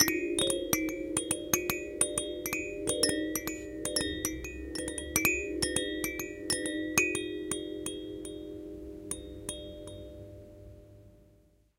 baby bell rattle 04
A baby bell/rattle. Recorded using a Zoom H4 on 12 June 2012 in Cluj-Napoca, Romania. High-pass filtered.